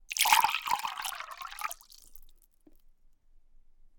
Water being poured, with a small drip in the background right at the end.
Liquid, aqua, bottle, filling, fluid, pour, pouring, splash, stream, trickle, water